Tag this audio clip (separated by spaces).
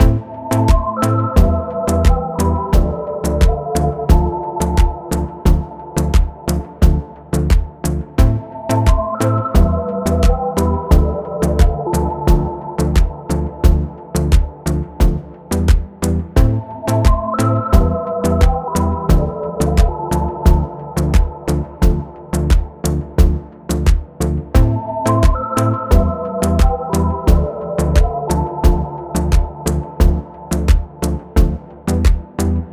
beat drum-loop game groovy instrumental melody movie music podcast quantized rhythmic slow soundtrack